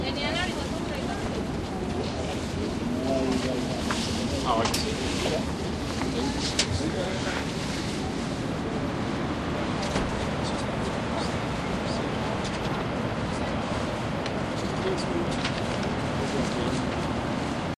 nyc esb observatory
Ambiance from the 86th floor observatory of the Empire State Building in Manhattan recorded with DS-40 and edited in Wavosaur.